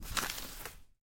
Page Fumble 01
06/36 of Various Book manipulations... Page turns, Book closes, Page
newspaper, turn, page, book, fumble, read, reading, paper, magazine